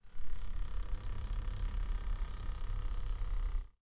Cuando un carro pasa